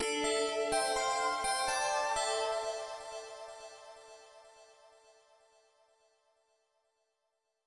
A vaguely oriental synth made with Octopus AU.
dminor, rhythmic, sharp, synth